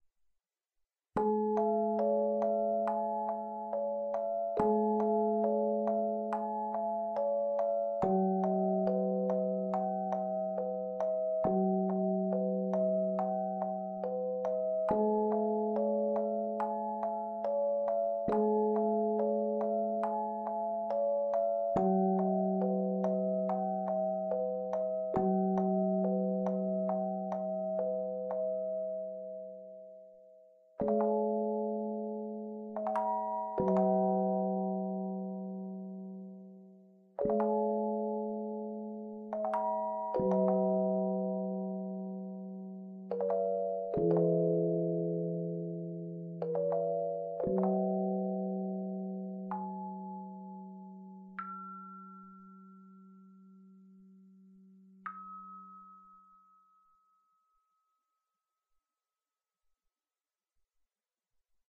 A small piece of music box music, created with a syntheziser. Recorded with MagiX studio, edited with audacity and MagiX studio.